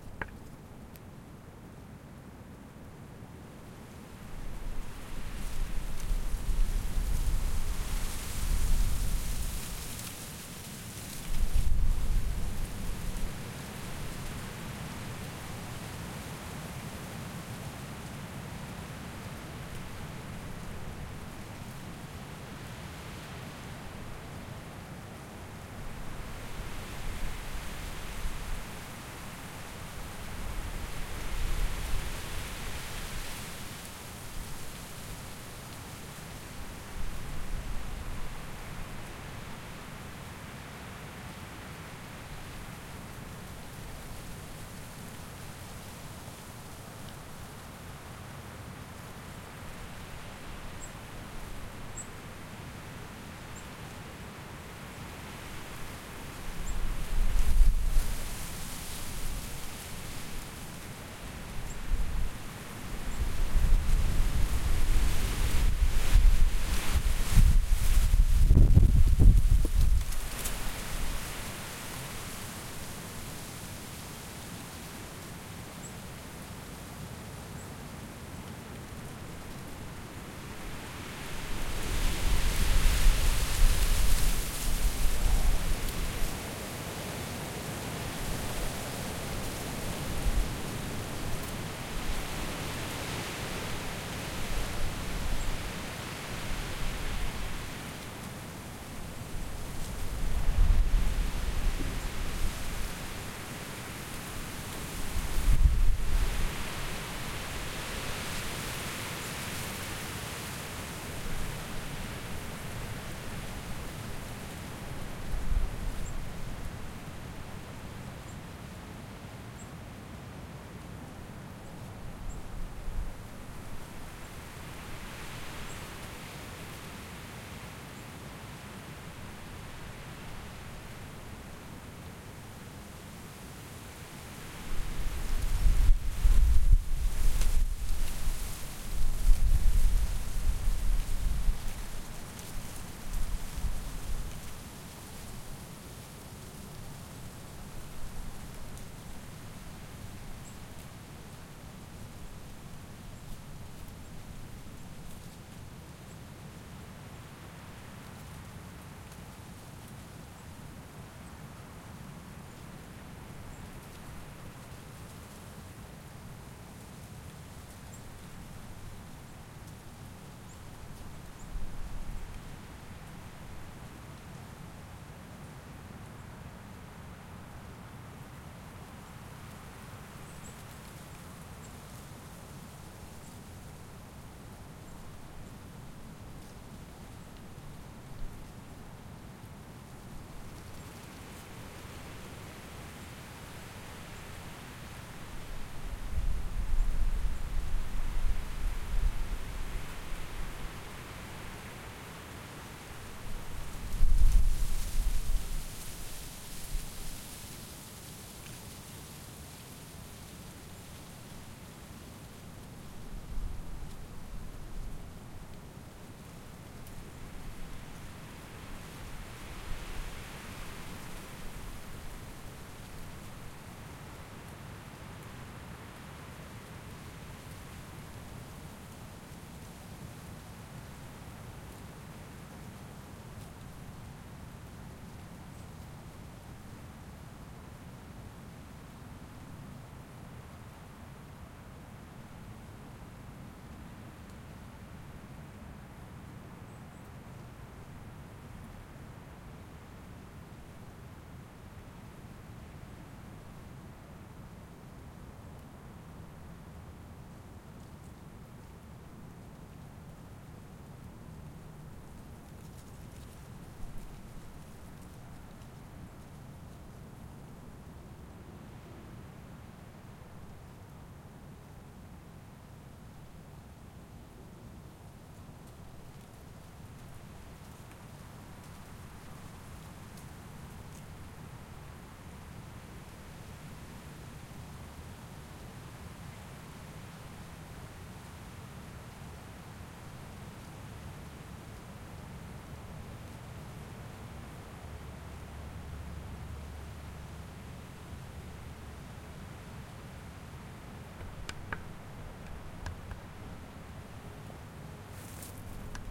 Wind-Gusts-late-autumn
Field recording in late autumn, New England, during 20+ MPH wind gusts. Pine forest.
autumn, field-recording, forest, gust, gusting, gusts, pine, wind